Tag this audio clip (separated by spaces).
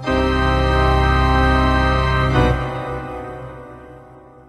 Organ Creepy Church